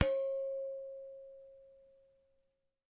Percasserole rez B 4
household, percussion